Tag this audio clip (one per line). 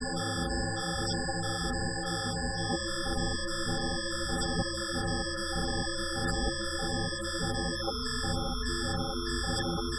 image
noise
space
synth